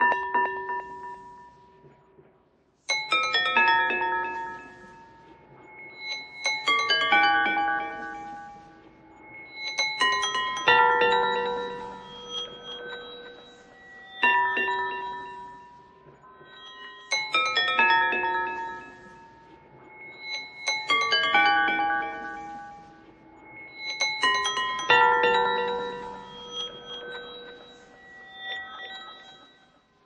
Tape Bowls 1
Banging on some bowls through a nice tape recorder then processed through ableton live
Ambient, Atmosphere, Bowls, Breathing, Dark, Delay, Echo, Eerie, Electronic, Filter, Movie, Noise, Processed, Reverse, Saturation, Space, Strange, Tape, bells